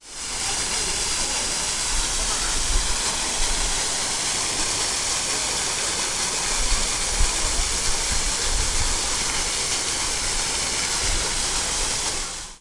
Sound of the polar bears' cascade and, in the background, ambient sounds of the zoo.